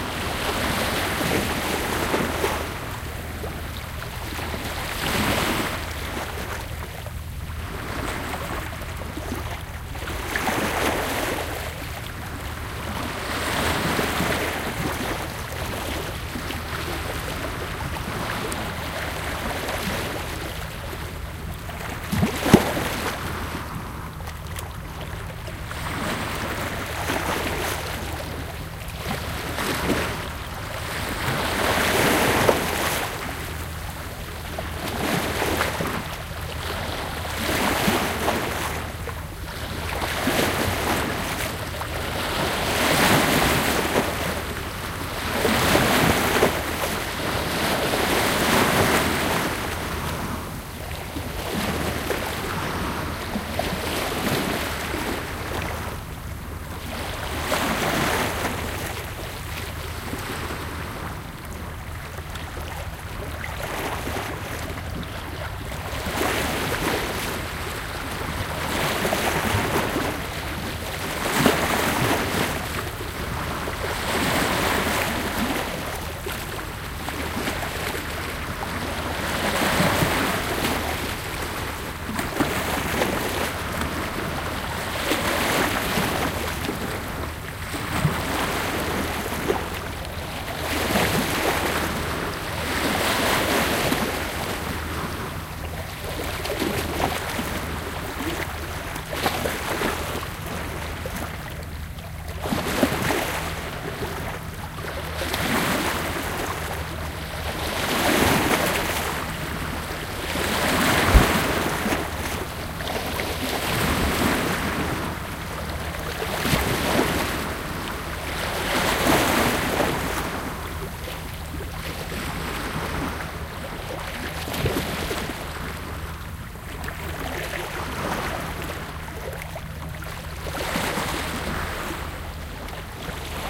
spanish banks 04
Binaural recording of waves on Spanish Banks beach in Vancouver, B.C.